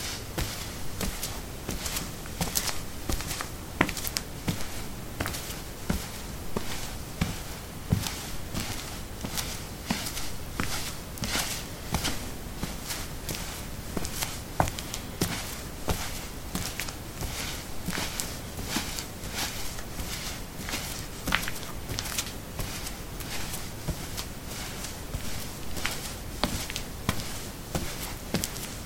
concrete 02a socks walk
Walking on concrete: socks. Recorded with a ZOOM H2 in a basement of a house, normalized with Audacity.